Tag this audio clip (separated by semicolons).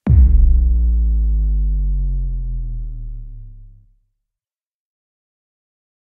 bass; drop; dubstep; low; sub